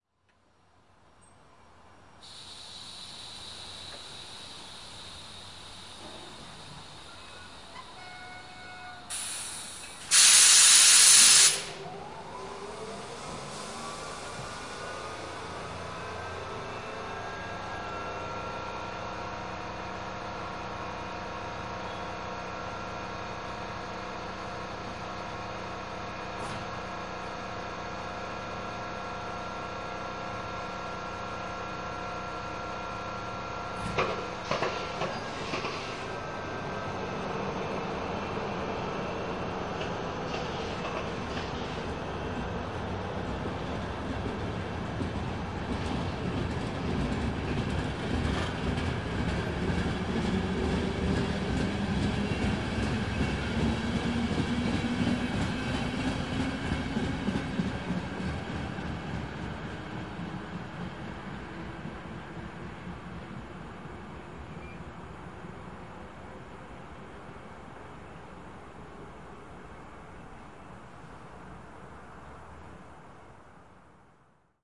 Organic train sounds
Departing train "industrial" sounds. Recorded at train station in Modrice, near to Brno, CZR. Audio includes very organic industrial clatter, vibrations and hydraulics sounds.
Recorded with Tascam recorder + tripod + windscreen.
In case you use any of my sounds, I will be happy to be informed, although it is not necessary. Recording on request of similar sounds with different technical attitude, procedure or format is possible.
clatter, hissing, hydraulics, industrial, iron, noise, passenger-train, rail, rail-way, trains, vibrations